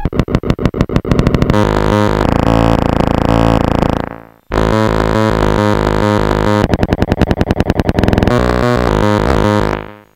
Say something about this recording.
This is a Casio SK-1 I did around a year ago or so From Reeds book plus a video out and 18 on board RCA jacks with another 25 PIN DPI that can run through a breakbox. Noise and Bent Sounds as Usual. Crashes ALOT. Oh and it's not the hardest "mother of bends" Serious, I wore socks and everything.
circuit-bent, noise, school, scenedrop, if-your-crazy, sfx, forground